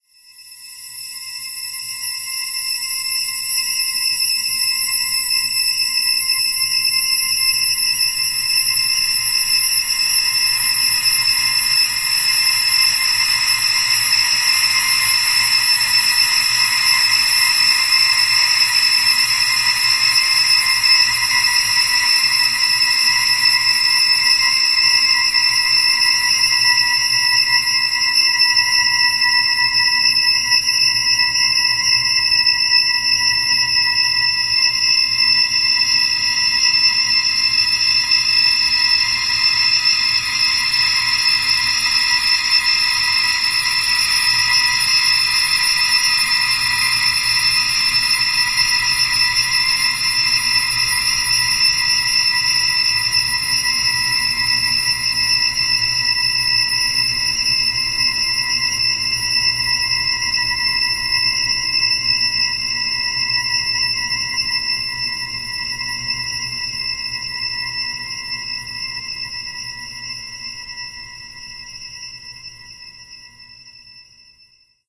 a slab of synthetic ice. or a cold shower...or
cold, drone, soundscape, artificial, pad, ambient